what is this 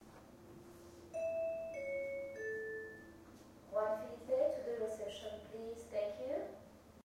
mySound GWECH DPhotographyClass reception call
announce, call, reception